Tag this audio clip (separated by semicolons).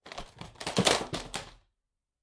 drop; dropped; DVD; fall; falling; floor; Shells